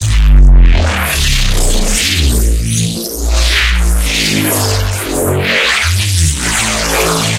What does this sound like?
This was a reese that I resampled multiple times using harmor.